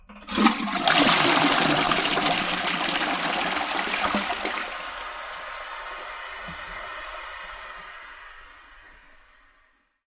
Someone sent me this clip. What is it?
Just sounds of toilet. Recorded on Blue Yeti.
toilet, bathroom, water